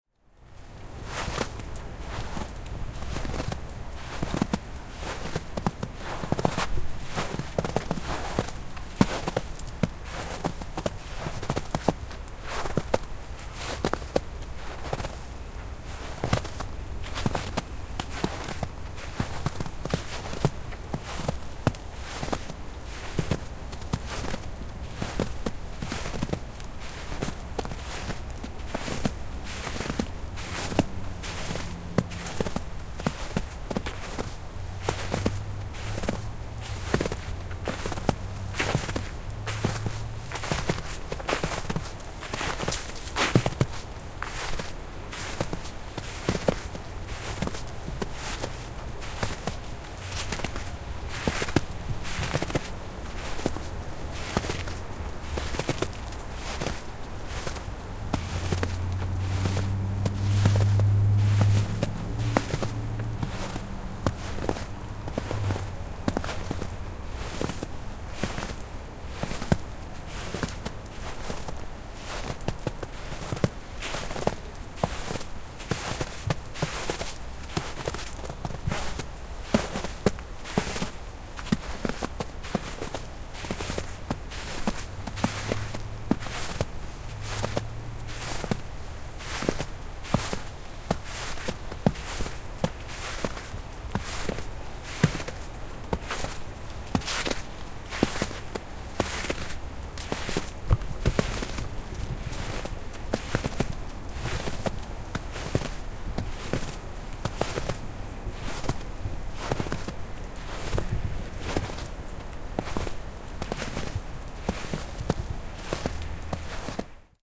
Walking in snow